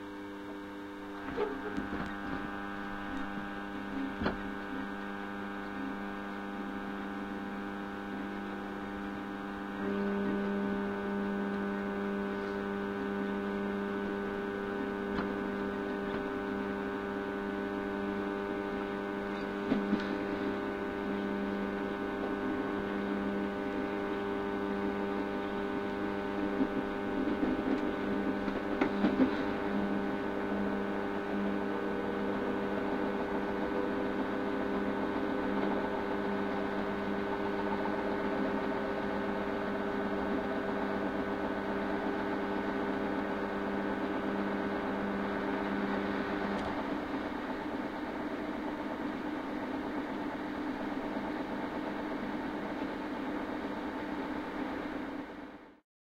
Contact mic recording
mic, recording, contact, Field